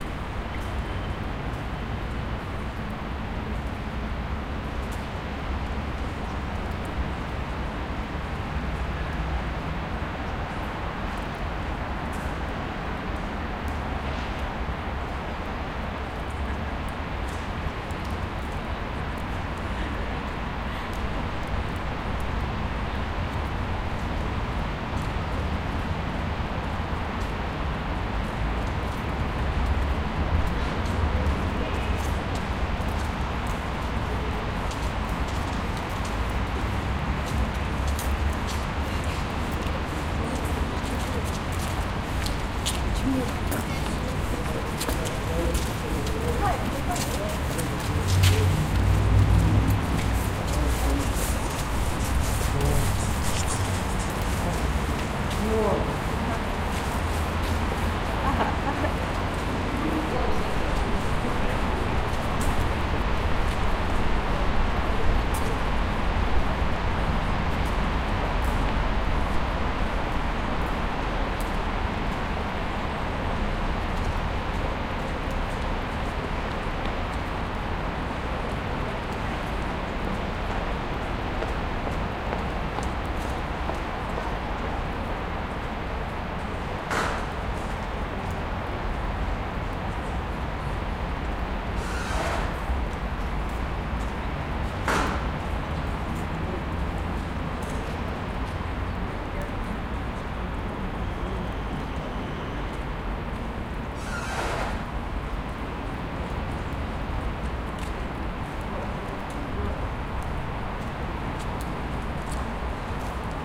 Noise of Novosibirsk street.
Recorded: 2013-11-19
XY-stereo.
Recorder: Tascam DR-40
atmosphere, Novosibirsk, town